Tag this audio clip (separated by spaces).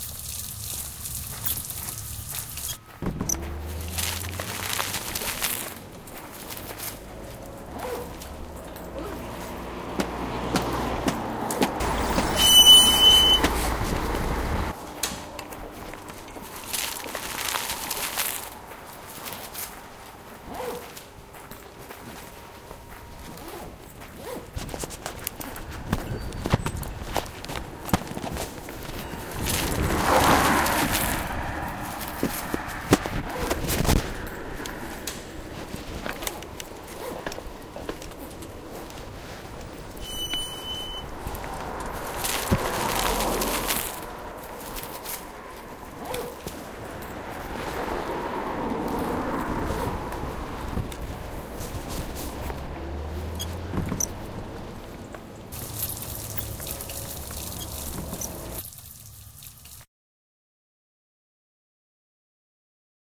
belgium cityrings ghent sonicpostcards wispelberg